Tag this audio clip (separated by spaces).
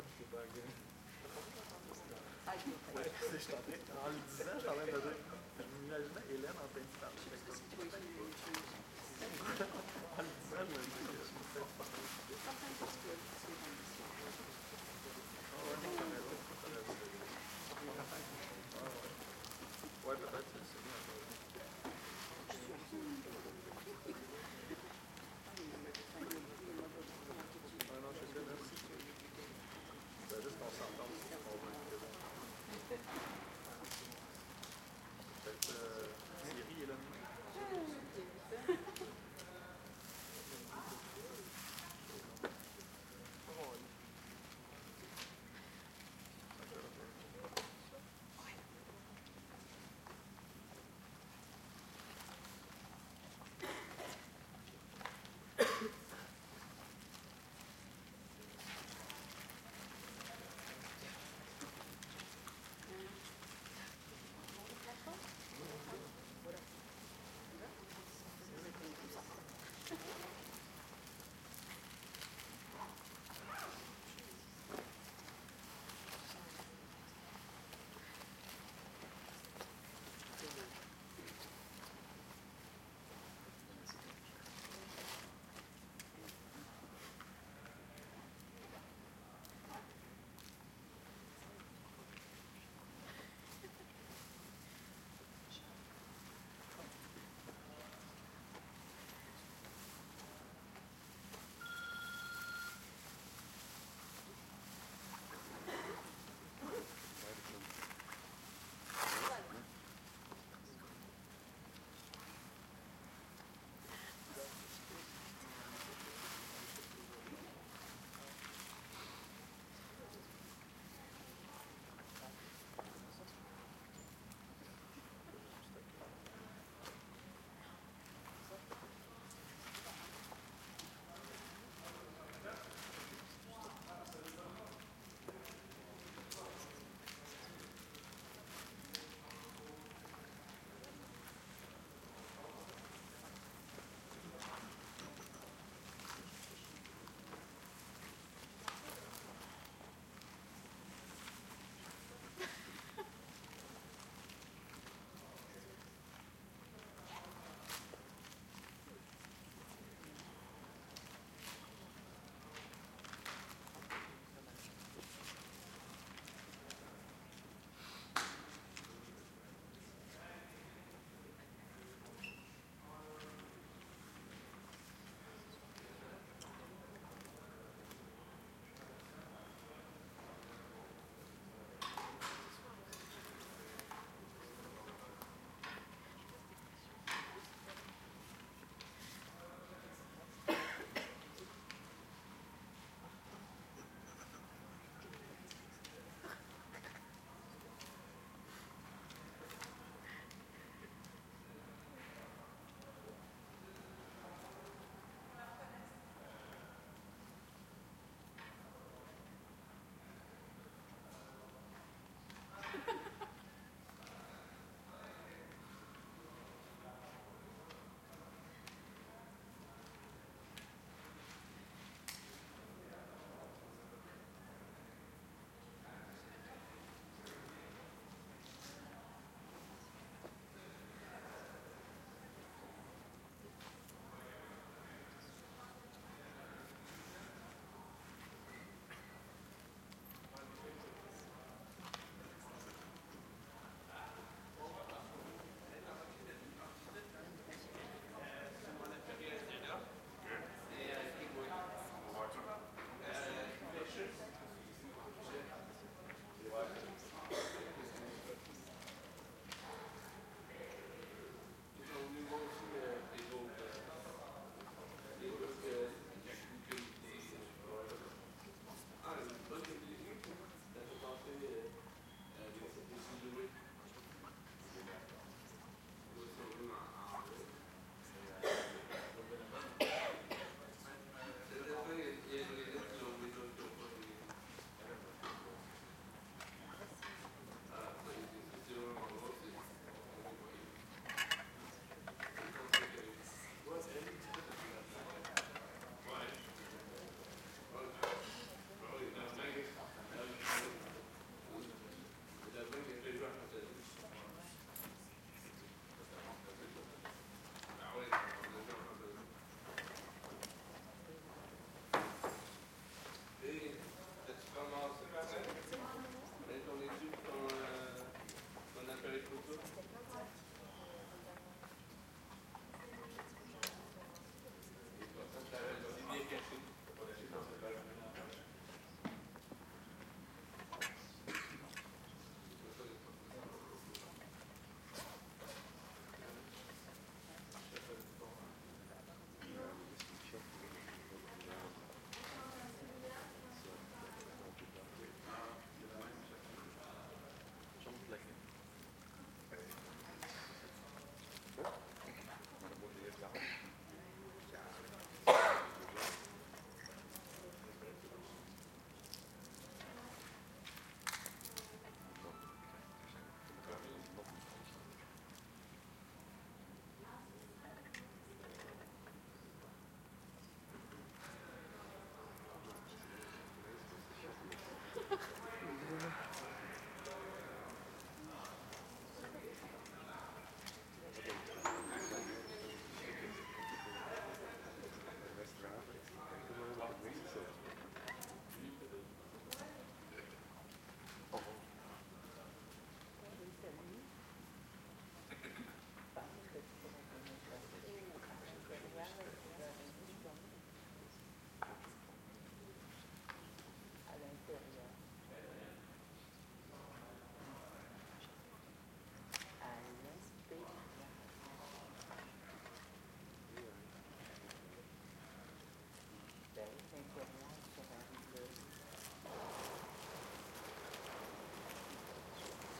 int walla theater chatter audience light settling crowd down waiting